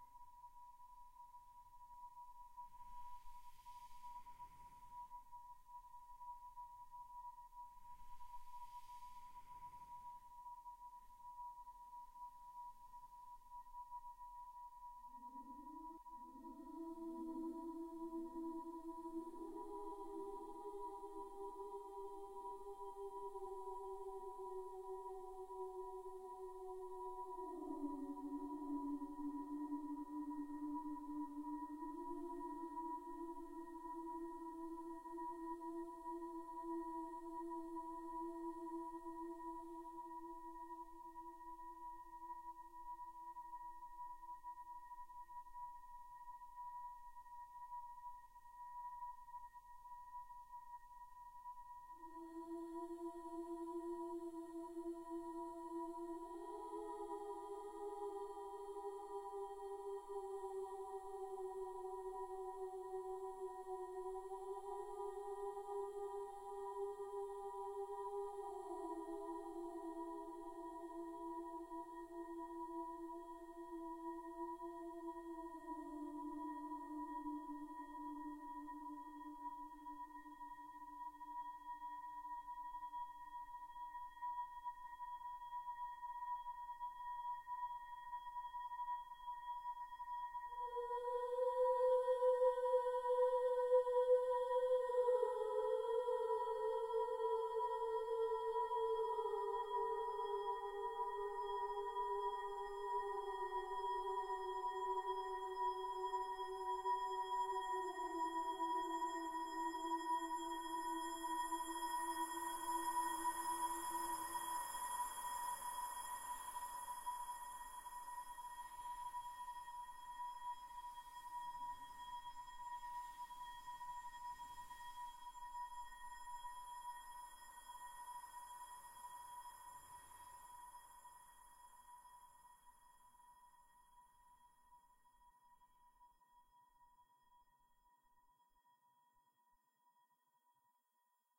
Sad Voice and Tone 2
Sad voice and tone(pinging a glass), using reverb and paulstretch. Recorded with Tascom Dr-5 and mixed with Audacity.